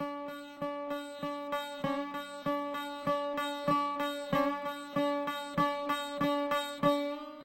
sarod loop1
Sarod w/no processing. loop
indian, raga, sarod, world